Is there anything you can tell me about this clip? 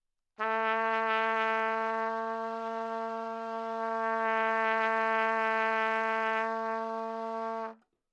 overall quality of single note - trumpet - A3
Part of the Good-sounds dataset of monophonic instrumental sounds.
instrument::trumpet
note::A
octave::3
midi note::45
tuning reference::440
good-sounds-id::1300
Intentionally played as an example of bad-timbre-errors